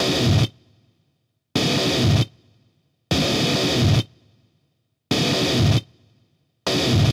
135 Grunge low gut 03
bit, blazin, crushed, distort, gritar, guitar, synth, variety